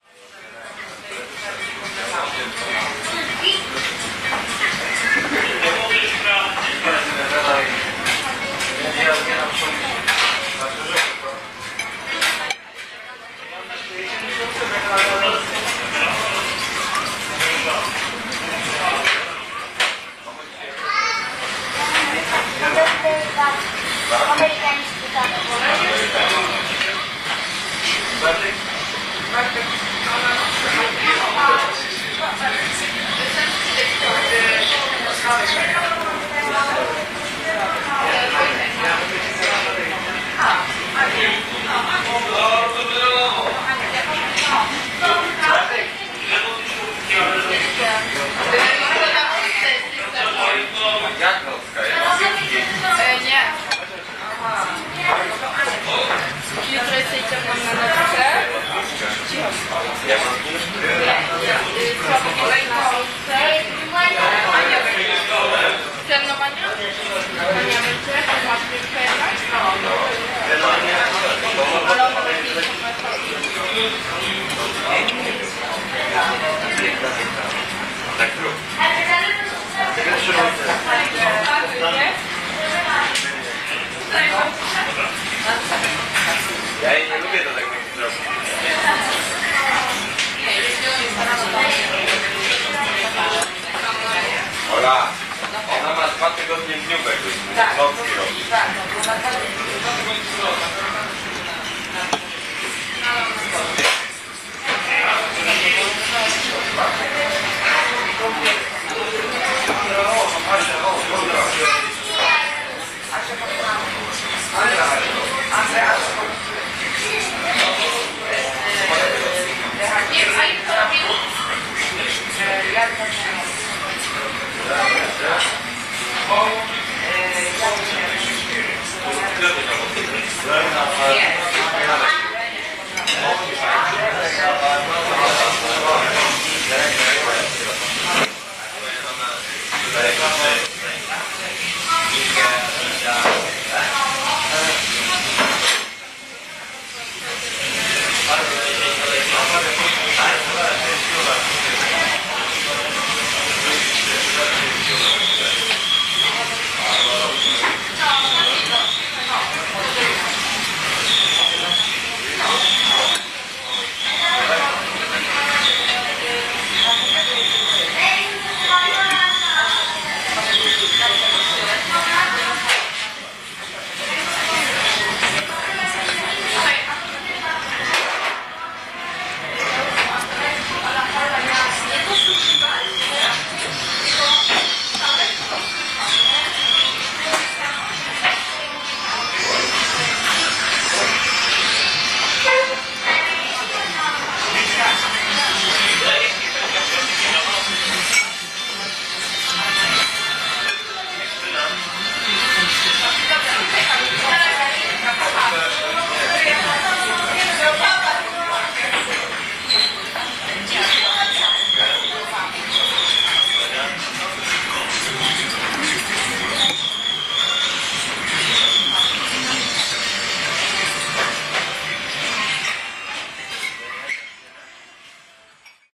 china pot bar 011110
01.11.2010: about17.30. in China Pot (China Kubek) Bar on Polwiejska street in the center of Poznan. I've pigged out.